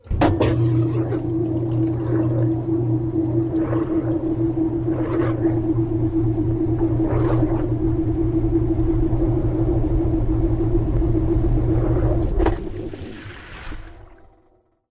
The washing machine is on spin mode. I'm afraid there's a bit of wind distortion in the latter part of the sound. This might make a neat sci-fi noise, maybe.
clean, cleaner, machine, washing, washing-machine